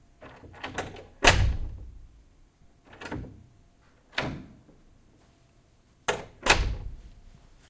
Door Open Close
This is a simple door opening and closing about three times. No creaking; very quiet door. Recorded with a Zoom H4.
Door, close, open